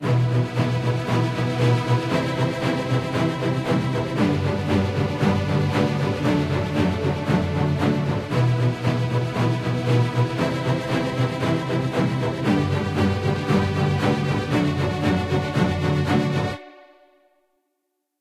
Epic Strings
This is a so called "epic" chord progression (AmCFG) played rhythmically by the string section of an orchestra: basses, cellos and violins.
cinematic, epic, hollywood, orchestra, sountracks, strings, trailer